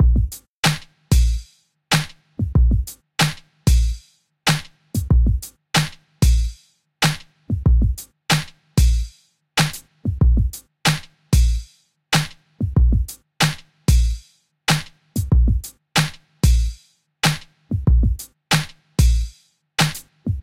Hip Hop Drum Loop 24
Great for Hip Hop music producers.
beat, drum, hip-hop, loop, sample, sound-pack